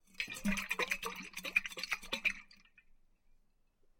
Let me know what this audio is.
Water in a metalic drinkbottle being shaken.